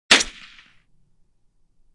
thanks
*******This sound was created by me recording with a Rode NTG 3 mic and a Roland R44 Field recorder on November 2014. I then edited it in Adobe Soundbooth.